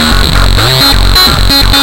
FLoWerS 130bpm Oddity Loop 001
High resonance experiment with TS-404. This is a somewhat average techno-ish loop. Pretty simple. Only minor editing in Audacity (ie. normalize, remove noise, compress).
techno, trance, electro, resonance, loop, experimental, dance